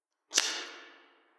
boosted flick clipper
A bit boosted flicking lighter sound
percussion, lighter, drum, industrial, hit, metal, field-recording, reverb, fire, foley, boost, house, percussive